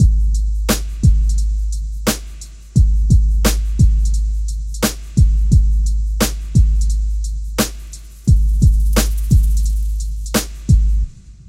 Conscience Pilot Drumloop
Made on FL studio 11
Written and Produced by: Nolyaw
Sample from "Conscience Pilot" link below
Tempo: 87bpm
If you do use these samples, just remember to give me a shout out.
1love_NLW
music, original, recording